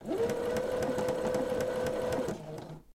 Brother Computer sewing machine. Recorded with AKG P220